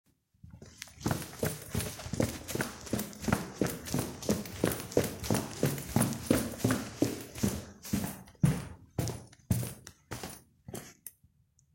Running down stairs
Running down concrete stairs
floor, boots, steps, stomp, footsteps, foley, walking, running, indoors, stairs, shoes, walk